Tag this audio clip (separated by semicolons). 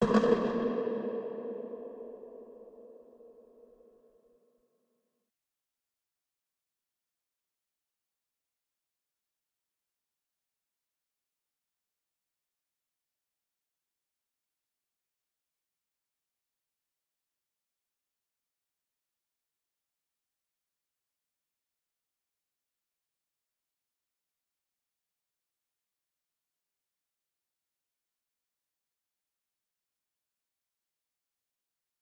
reverb; impulse; hit; tunnel